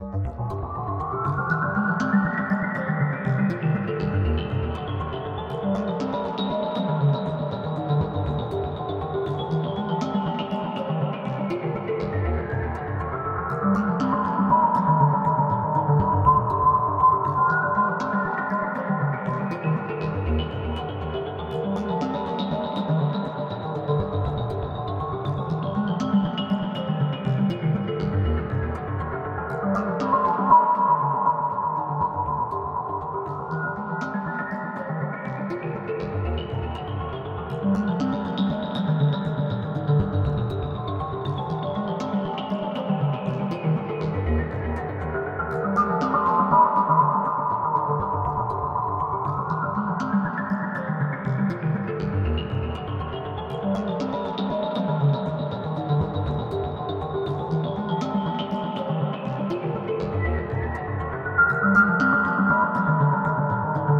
ARP A - var 5
ARPS A - I took a self created Juno (I own an Alpha-Juno 2) sound, made a little arpeggio-like sound for it, and mangled the sound through some severe effects (Camel Space, Camel Phat, Metallurgy, some effects from Quantum FX) resulting in 8 different flavours (1 till 8), all with quite some feedback in them. 8 bar loop at 4/4 120 BPM. Enjoy!
sequence, melodic, juno, arpeggio, 120bpm